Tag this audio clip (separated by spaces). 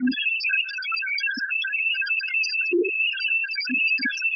fx water noise